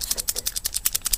some tool clicks
Recorded with an Archos.